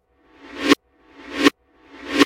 Reverse Trash Can Hit
A reversed and slowed down hit on a trash can.
MTC500-M002-s14, Hit, Trash, Can